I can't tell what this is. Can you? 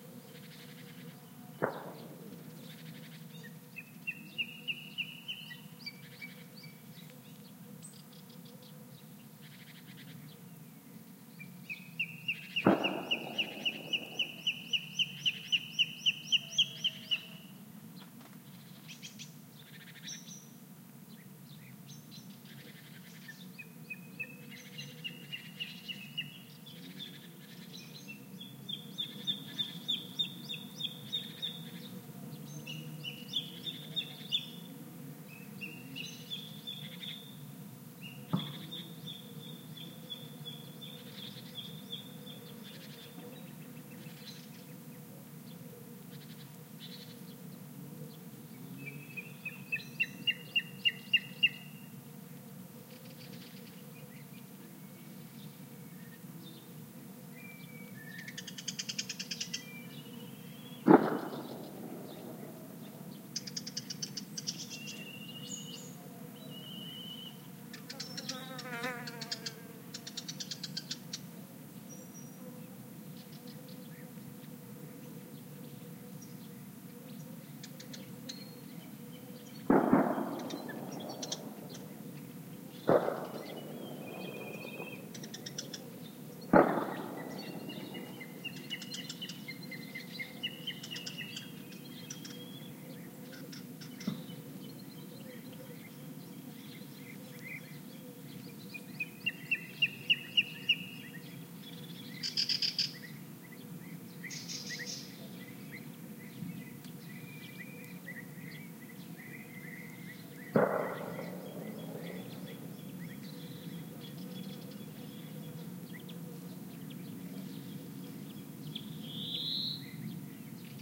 part of the '20070722.pine-woodland' pack that shows the changing nature of sound during a not-so-hot summer morning in Aznalcazar Nature Reserve, S Spain. Trailing numbers in the filename indicate the hour of recording. This sample was recorded in a particularly sweet spot and includes many close bird calls (mostly warblers, jays and Black Kite), noise of breeze on trees, distant gunshots, distant motorbikes. Insects begin to be very active and fly by the mics, but no cicadas yet.